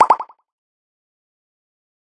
Echo Pop 1
Short pop echo sound effect for video editing, fun games, comedy film, presentation, and commercial business use.